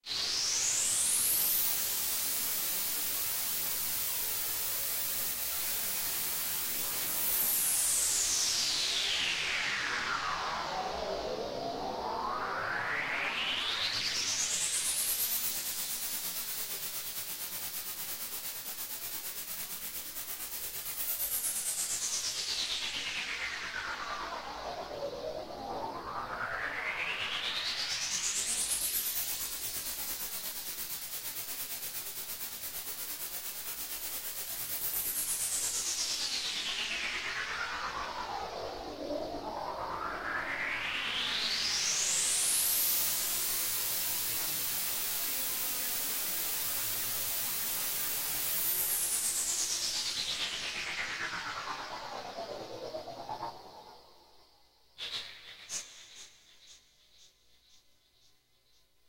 Some effect. Something like wind or train?